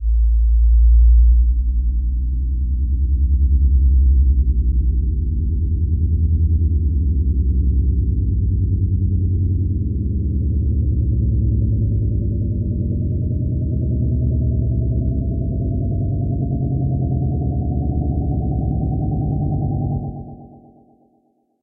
Sine wave "boing" bass rendered in Cooledit 96 that sounds like a UFO.
synthesis, synth, boing, ufo, wave, bass, digital, synthetic, sine, synthesizer